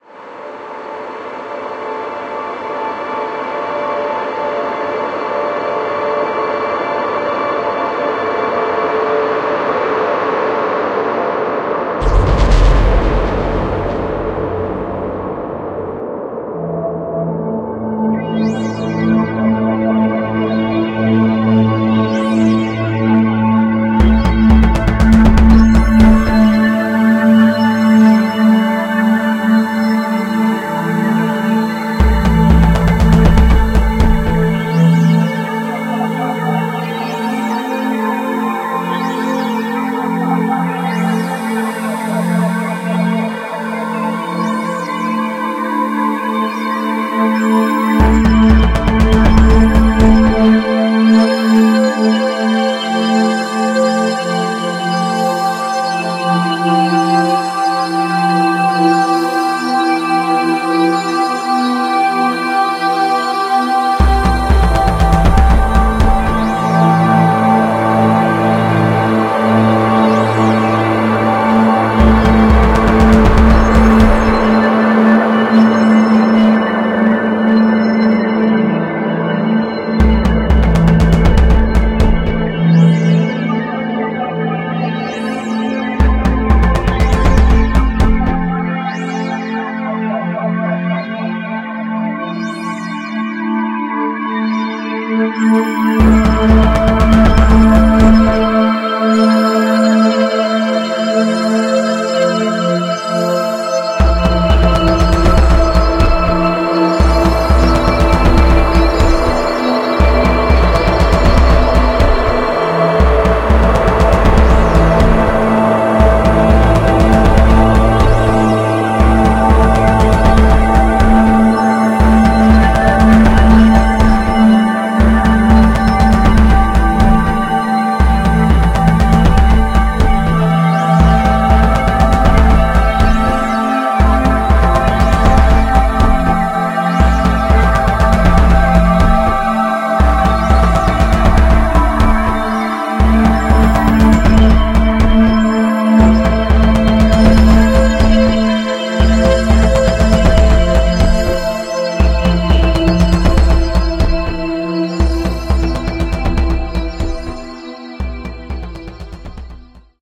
Content warning
Cinematic ambient recording. All footage made on cubase 10.5 DAW. For the recording I used samples and loops with 10.5 cubase, I used HALion Sonic SE. Regards, Bart.
ambience, ambient, anxious, atmo, atmos, atmosphere, background, background-sound, cinematic, dramatic, sinister, suspense